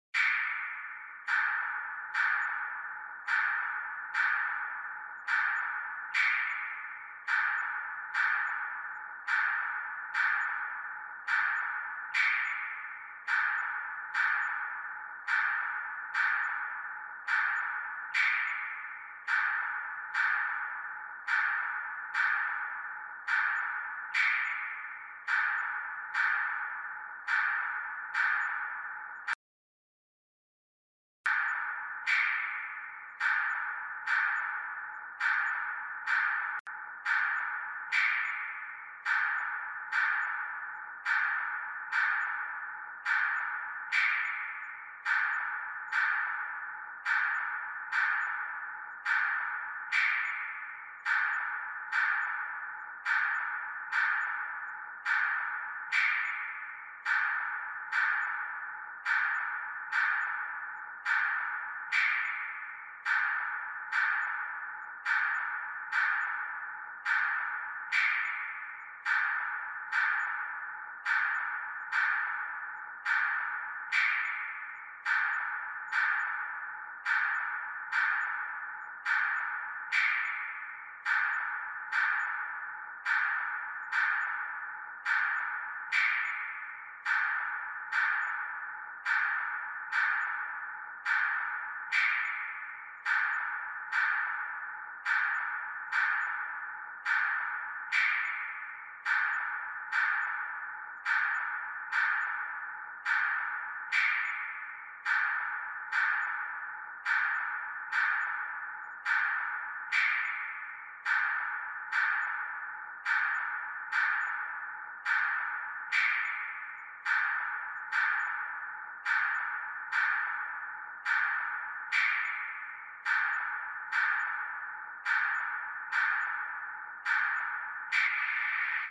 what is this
A reverbed paultretched click track. I don't recall the exact bpm though.
The paulstretch was of a factor of 1.0.